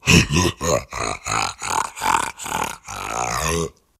Flail Demon Laugh
The laugh of a huge demon, who likely carries some sort of dangerous weapon.
Monster, Satan